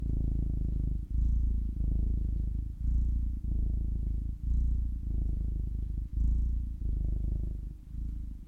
Sound of a male cat purring while laying on a bed. There was a slight modification of amplitude using Adobe Audition.